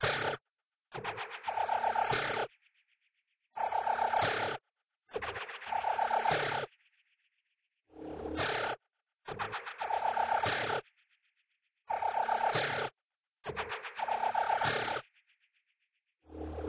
Pew loop (115bpm)
Loop made by chopping up prepared piano samples, pitching those and putting a granular delay on top of that.
airy, industrial, resonator, rhythmic, techno